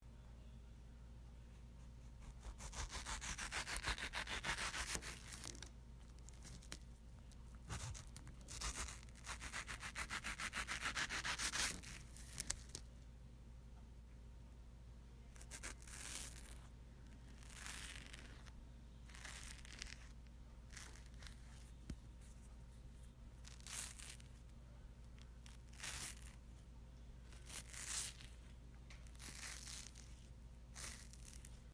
Cutting a mango on a cutting board.